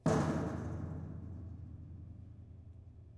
Metal Drum Hit
Hit a metal gas tank inside a shop.
Hit, Metal